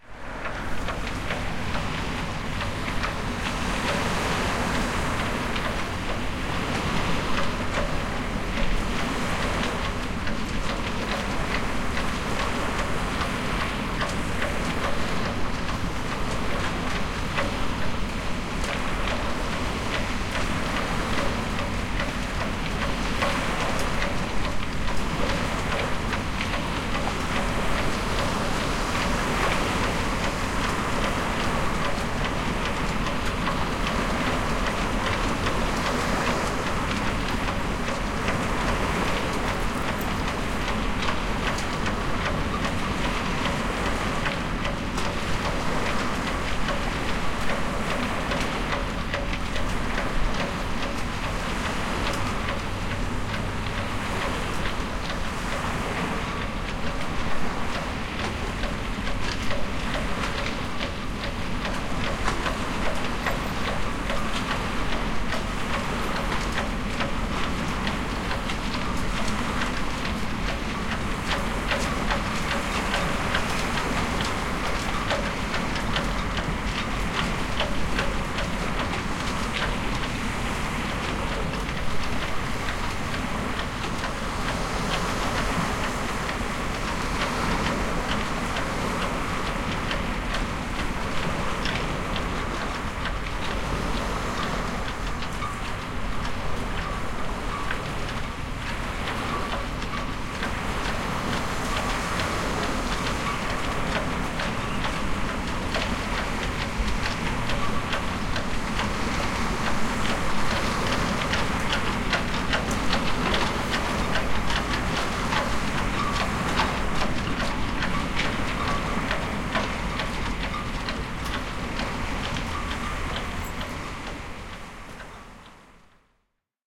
Wnd&Wvs&Msts
Windy day by the shore, near the boatyard. Processed. DR-05
Wind, sailboat-rigging, Ocean, processed, Waves, aluminium, sailboat-masts